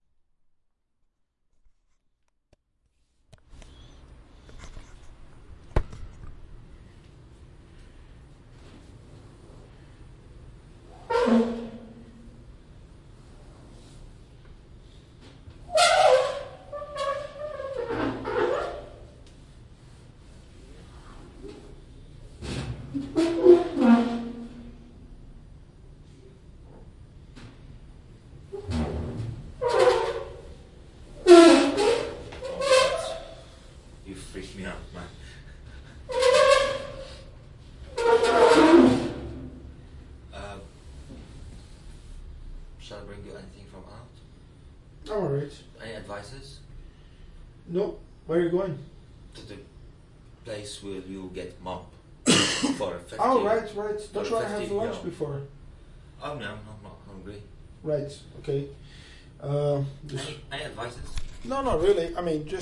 This was recorded in my kitchen by rubbing fingers on the cooktop of my stove, on a Zoom Portable Recorder. This file is the original recording without any edits.
noise, resonance, stove